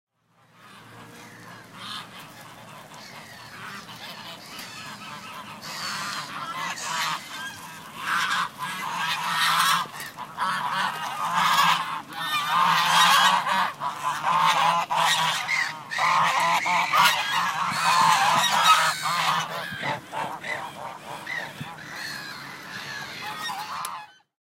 Geese freaking out
Geese freak out. Low quality iPhone recording.